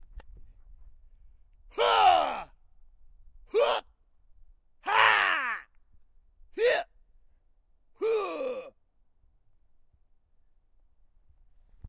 Kung Fu Scream
A friend mimics a Kung Fu fighter
Sony PCM D100
2018
Arts, Fu, Kung, Man, Martial